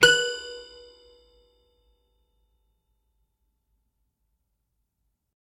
srhoenhut mfp B
A single note played on a Srhoenhut My First Piano. The sample name will let you know the note being played. Recorded with a Sennheiser 8060 into a modified Marantz PMD661.
children, funny, my-first-piano, note, one-shot, piano, sample, srhoenhut, toy